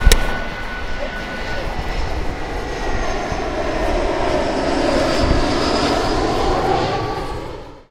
Avion - Nerea Alba
The sound of a plane. Recorded with a Zoom H1 recorder.